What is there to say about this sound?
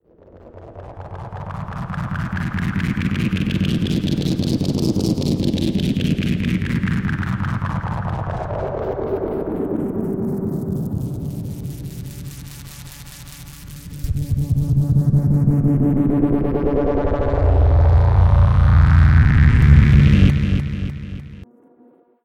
shuttle lands001
Electronic loops and noise for your next science fiction masterpiece.
electronic, fiction, noise, oscillation